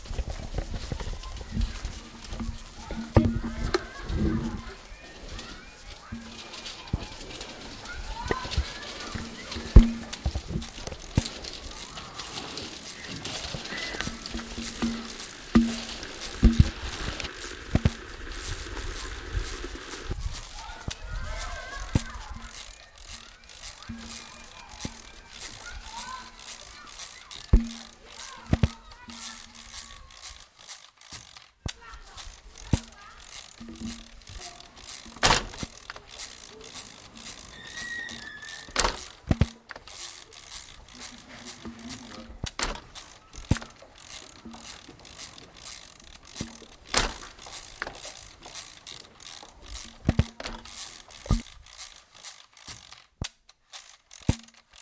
TCR postcard sixtine,maelle
France, Pac, Sonicpostcards